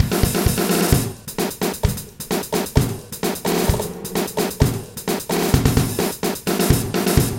Metal Drummer 130
Awesome drum kit with a kick ass beat
loops, mix, drum, 130, bpm